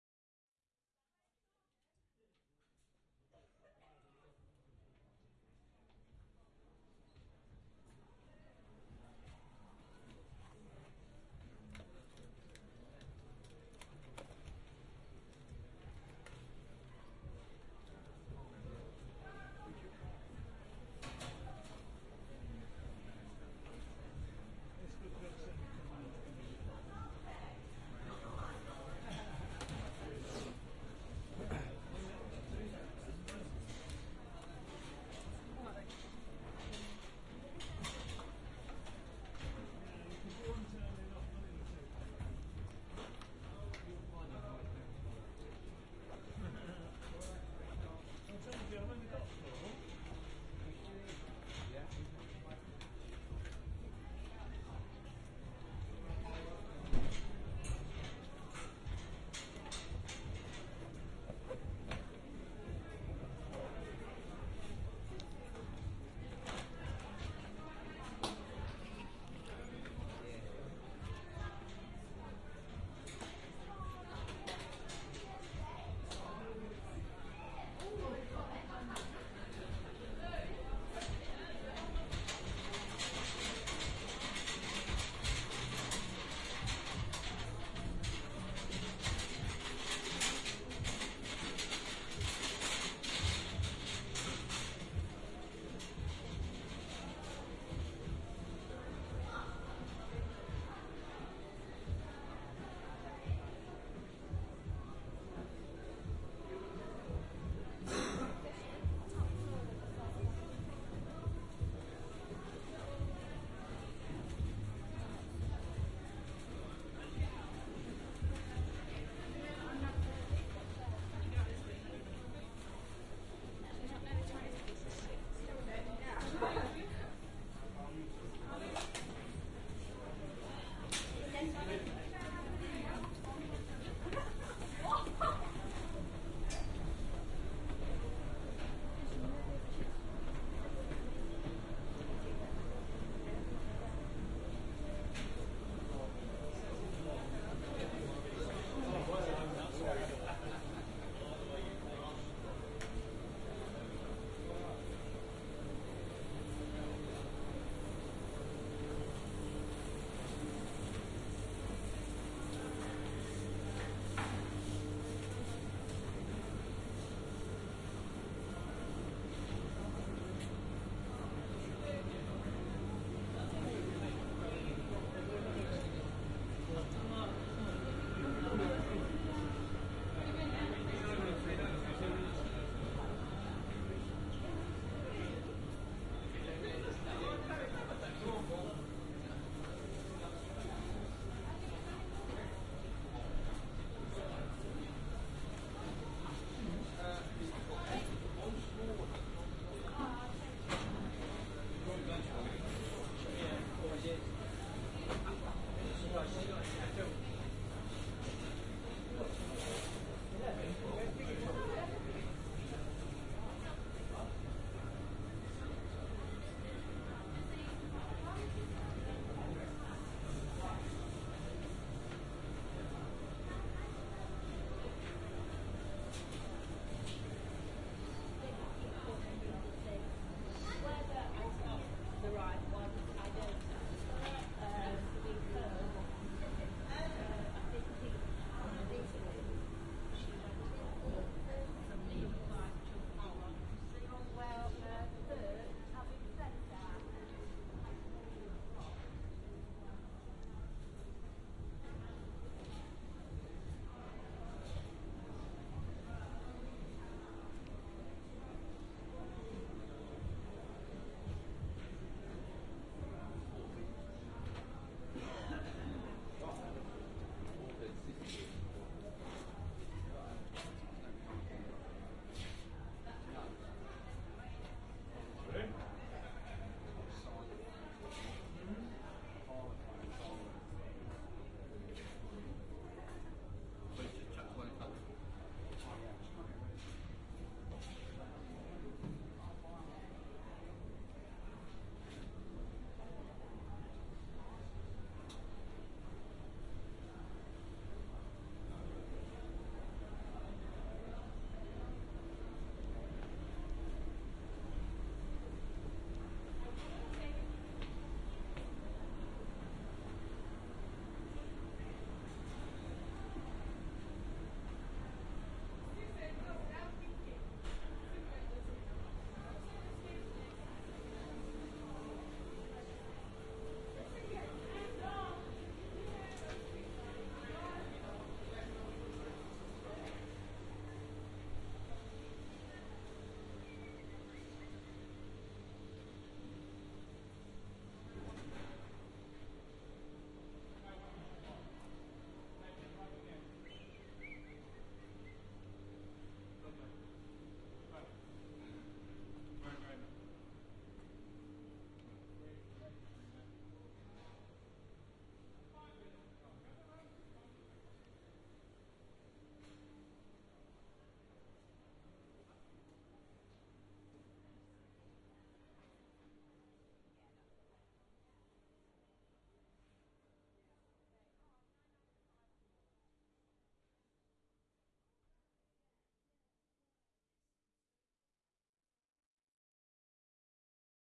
Soundwalk at Leicester Indoor Market 10.03.12
A binaural recording from Leicester's indoor market, one of the cultural hubs of the city. The indoor market has a variety of stalls which include butchers, fishmongers, thrift stores, clothes stores, records shops, textile shops, and a café.